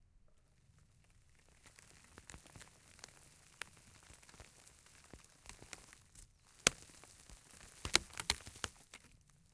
rip of a rotten bough slowly, close up, cracking, H6
break, close-up, crack, dead, dirt, h6, leaves, rip-of, sand, slow